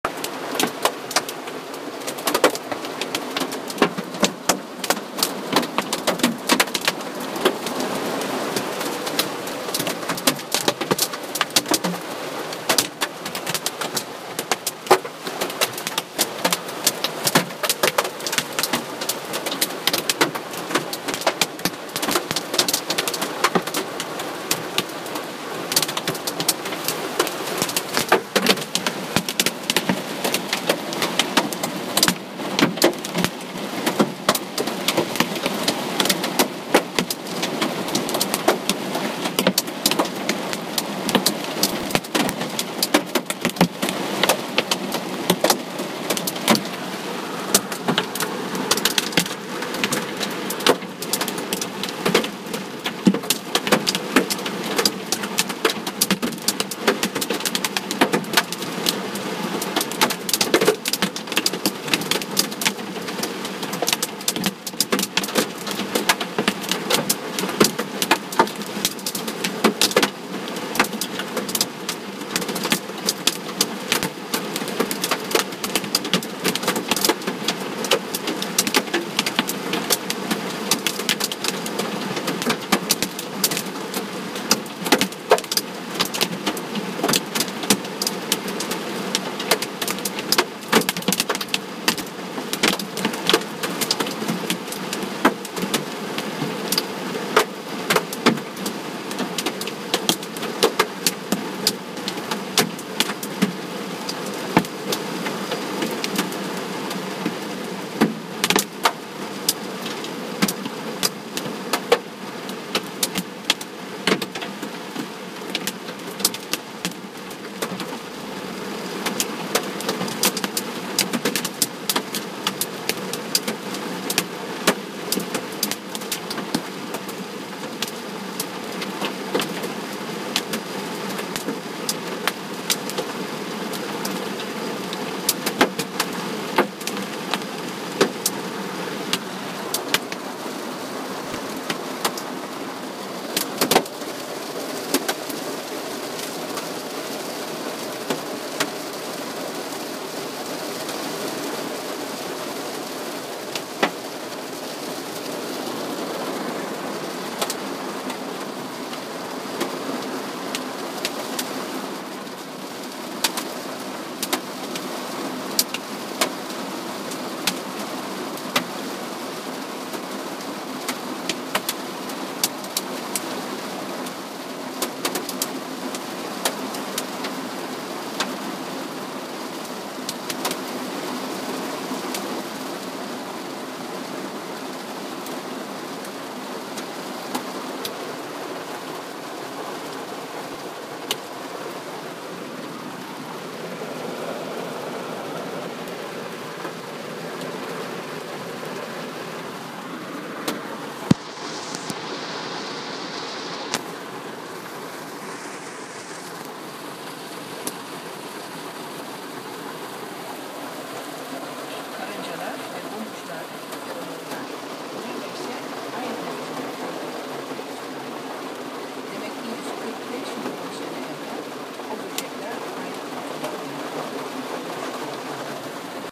hail on car
hail falling on the car
car, hail, rain, storm, thunder, thunder-storm, weather, wind